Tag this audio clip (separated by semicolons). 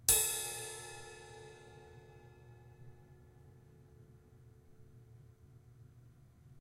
cymbal; drum; kit